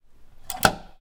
The sound of a door lock/latch being closed.